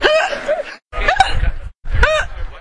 Three weird hiccups of a 26 years old female. Noisy background (people laughing)
hiccup, voice, human, female